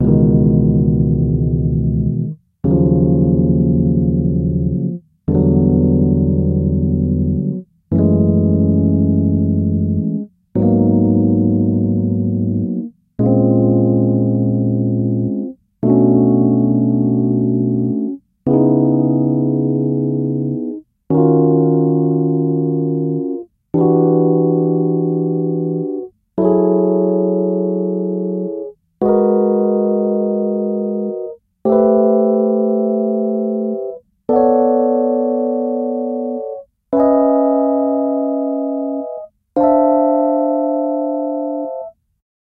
neo-soul-chords
Different Minor 9th (Neo-Soul) Chords, inverted. E-Piano.
neosoul, neo, soul, rhodes, inverted, rnb, minor, vibrato, epiano, chords, fender, 9th